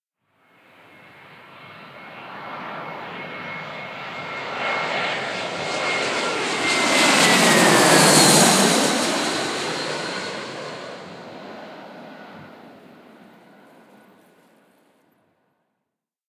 Plane Landing 09
Recorded at Birmingham Airport on a very windy day.
Aircraft, Flight, Flying, Jet